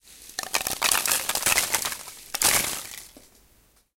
rs polystyrene handling 07
A session to test out my new Shure Motiv MV88. All recordings are of a bowl of polystyrene packing peanuts.
beads, packaging, packing, polystyrene, peanuts, S